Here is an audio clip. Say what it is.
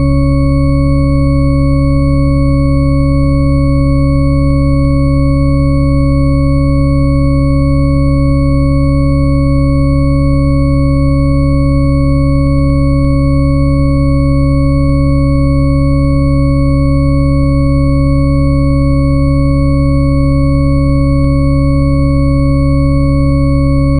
Shepard Note C#
From Wikipedia:
"A Shepard tone, named after Roger Shepard (born 1929), is a sound consisting of a superposition of sine waves separated by octaves. When played with the base pitch of the tone moving upward or downward, it is referred to as the Shepard scale. This creates the auditory illusion of a tone that continually ascends or descends in pitch, yet which ultimately seems to get no higher or lower."
These samples use individual "Shepard notes", allowing you to play scales and melodies that sound like they're always increasing or decreasing in pitch as long as you want. But the effect will only work if used with all the samples in the "Shepard Note Samples" pack.